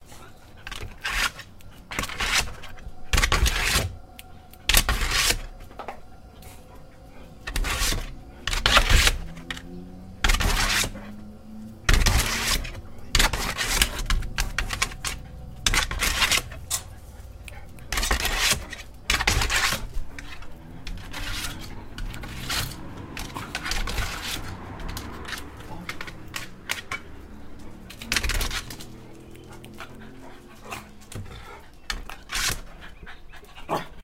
Dog Jumps against Door
Dog jumping against door
Dog, Door, Jump